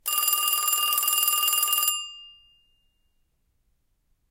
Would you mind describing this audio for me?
Phone Ringing #5
noise, phone, ring, ringing